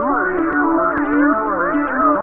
An quick, airy loop made from two separate loops mixed over eachother. Made with TS-404. Thanks to HardPCM for the find, this is a very useful loop tool!